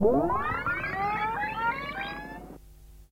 Tandberg ¼" tape Revox rewind
50 year old tape stock. Tandberg ¼" tape on an equally as old Revox machine.
Tandberg